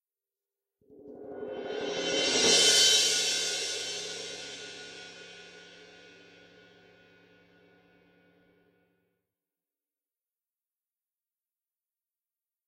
cymbal roll loud
Crescendo roll from soft to loud on 19" crash cymbal with mallets
cymbal, loud, cymbal-roll